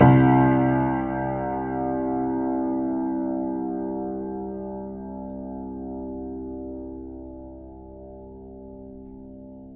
My childhood piano, an old German upright. Recorded using a Studio Projects B3 condenser mic through a Presonus TubePre into an Akai MPC1000. Mic'd from the top with the lid up, closer to the bass end. The piano is old and slightly out of tune, with a crack in the soundboard. The only processing was with AnalogX AutoTune to tune the samples, which did a very good job. Sampled 3 notes per octave so each sample only needs to be tuned + or - a semitone to span the whole range.
It is a dark and moody sounding, a lot of character but in now way "pristine".